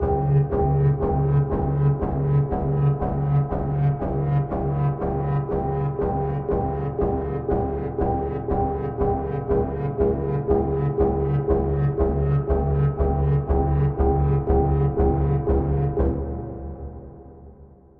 monotone alarm M97 9f
A monotone alarming beat – a little processed with filters
120bpm, alarm, alarming, beat, dark, deep, dull, hammer, hammering, knock, knocking, metallic, monotone, monotonous, muffled, nervous, oppressive, reverb, roar, roaring